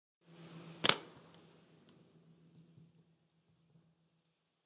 dhunhero slamlaptop
I wanted to create a "thud" sound, for example, a character landing and whatnot. I just slammed my laptop lightly and recorded it lol.
laptop, slam, thud